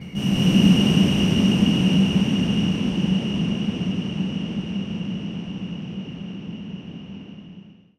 SteamPipe 4 Ambient Landscape C5
This sample is part of the "SteamPipe Multisample 4 Ambient Landscape"
sample pack. It is a multisample to import into your favourite samples.
An ambient pad sound, suitable for ambient soundsculptures. In the
sample pack there are 16 samples evenly spread across 5 octaves (C1
till C6). The note in the sample name (C, E or G#) does not indicate
the pitch of the sound but the key on my keyboard. The sound was
created with the SteamPipe V3 ensemble from the user library of Reaktor. After that normalising and fades were applied within Cubase SX & Wavelab.
reaktor, ambient, atmosphere, pad, multisample